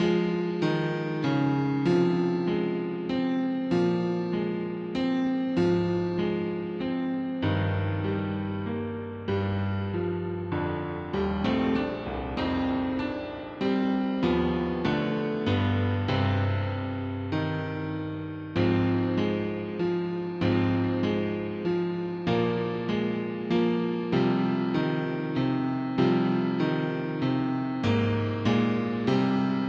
Classical Piano Loop
Tried to made a brief loop that sounded like classical or something, 97 bpm